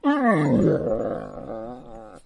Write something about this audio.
Female Great Dane Yawn
Dane, Female, Great, Yawn